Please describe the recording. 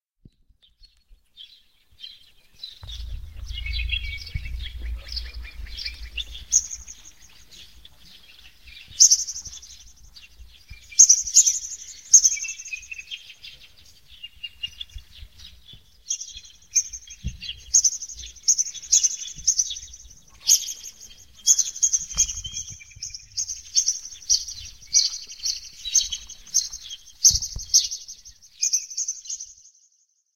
pajaritos hifi
It's a sound of a group of birds which are signing in the early morning.